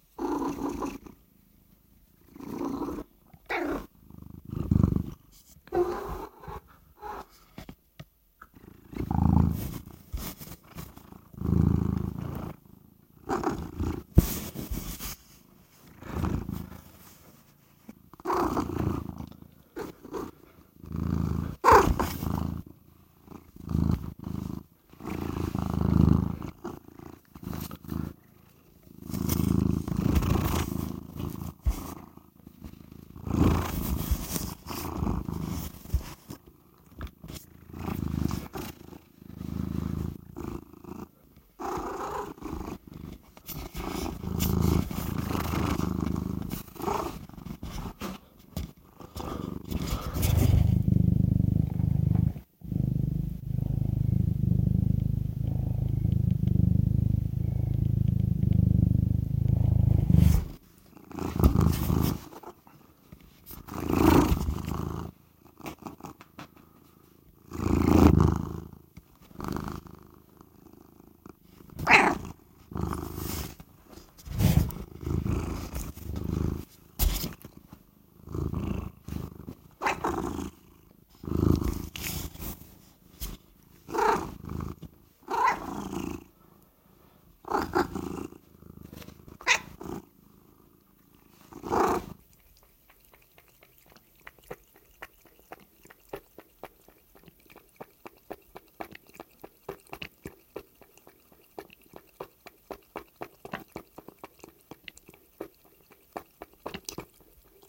This is Penny, a 7 year old female black and white cat who was being very affectionate. Recorded her in the bathroom purring and rubbing on the mic. 50 second in, I put the mic up to her chest while purring. Then at 1 and a half mins, I recorded her drinking water out of the sink.
Hopefully someone finds good use for her sounds. I can always get more, and her brother as well.
Mew, drinking, Cat, Meow, Purrrr, Puring, animal, water, Kitty